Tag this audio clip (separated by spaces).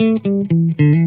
loop electric guitar